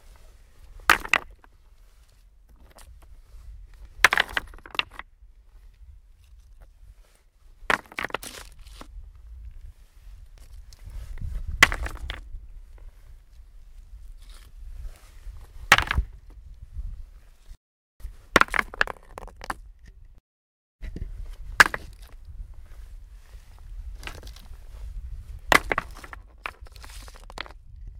Falling and rolling stones. Recorded with a Zoom H1.